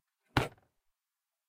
close-plastic-box
Closing a plastic box. Nothing more, nothing less